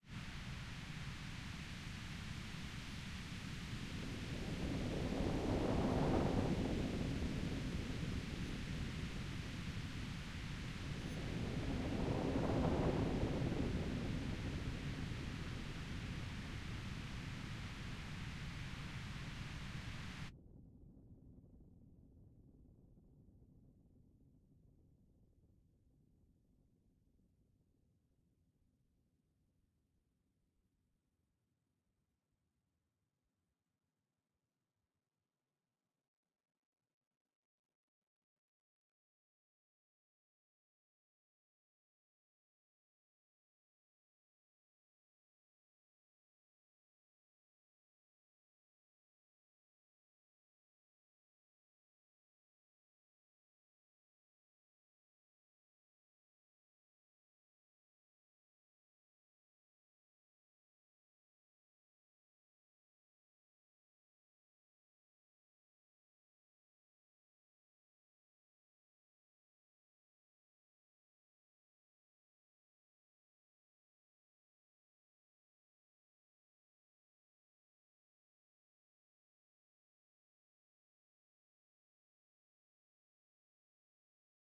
Sonido recreado con síntesis adictiva y sustractiva
Weather, Rain